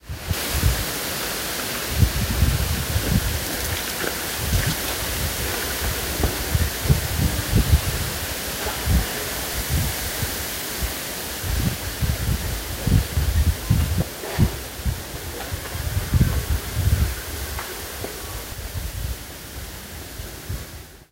Sound of wind and bamboo trees recorded with my phone.
trees, wind